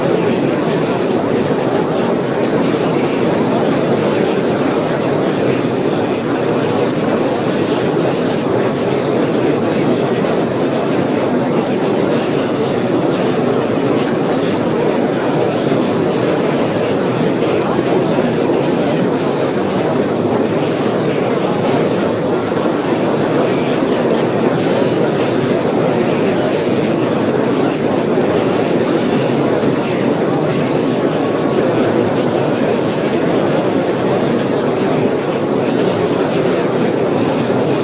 A large crowd of people talking. Short version
69 lectures, combined with each other. The result is a steady hum without any post-signal processing.